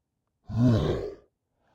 Deep Groan 2
Deep Groan Creature Monster
Groan, Monster, Creature